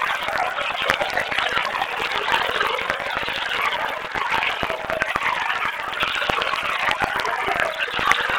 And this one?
Ghosts auditioning for American Dead Idol.
ghost, grains, granular, synth, voice